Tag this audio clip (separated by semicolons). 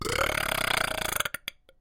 belch; burp